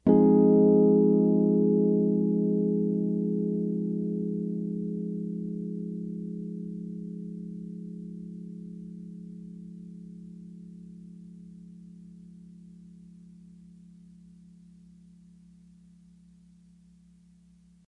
A single chord played on a Rhodes Mark 2 piano. Rhodes into tube pre-amp into Apogee Duet.
chord, electric, piano, rhodes, tone